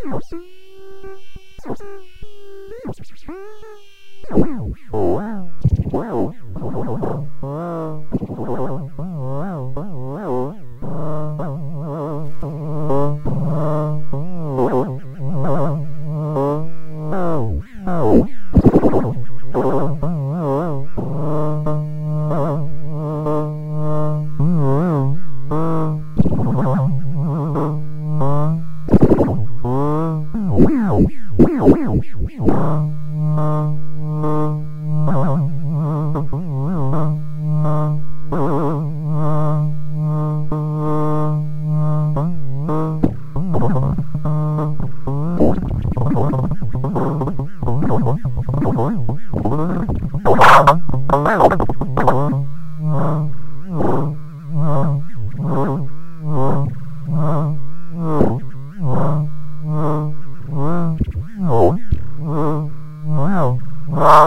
3-4 Drunk Lfo
Analog, DIY, Modular